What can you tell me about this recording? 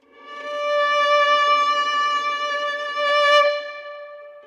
Single note swell harmonic
This is a harmonic on the fiddle getting louder
acoustic, harmonic, note, riser, single, swell, violin